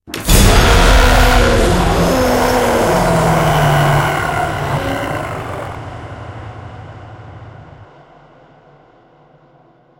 This is a sound that i've crafted mainly for video games
was created in ableton with some vocals i recorded.
stacked and pitched down and up.